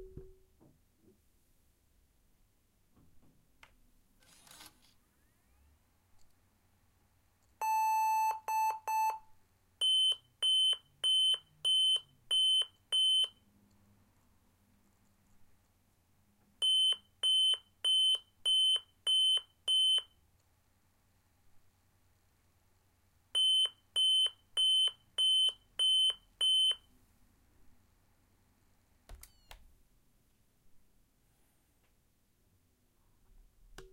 Dell 1555 laptop malfunction beeps.
Recorded with Zoom H4n.